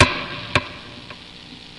clank with delay
A random sound from the guitar.
electric, guitar